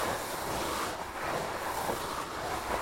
gravel sliding around 6 short
Foley SFX produced by my me and the other members of my foley class for the jungle car chase segment of the fourth Indiana Jones film.
sliding, gravel